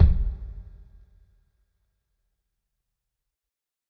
Kick Of God Bed 037
drum, record, kit, god, trash, pack, kick, home